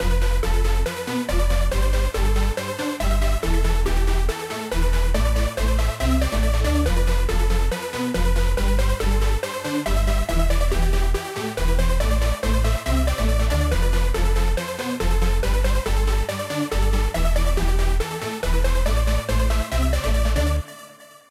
Here is a short loop i used in my new song, there are 2 identical loops. This one and one played on a piano, i decided to upload just this one for now.